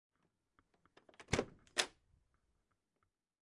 Bedroom Door Opens 1 heavier handle
Slamming a bedroom door open. It was recorded with an H4N recorder in my home.
Bedroom, door, open, slam